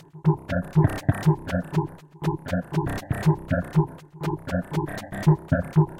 Copyc4t mello01
A simple loop @ 120 bpm created using sounds from this pack by copyc4t.
Cut out small interesting portions of copyc4t's sounds in Audacity. This time I was looking for something less rhythmic and a bit more mellodic. That would also play well with my previous rhythmic/percussion loops.
Used only one small cut from this sample
Loaded it into a Reason sample player. The output of the sample player was fed into a Maelstrom synth so that I could put it through that synth's waveshaper and one of the filters.
Then it was a matter of playing with filter, envelope and waveshaper settings until I found some sounds I liked.
A bit of delay was applied on top of a sequence of notes I played on the midi keyboard.
seamless-loop, dare-26, 120bpm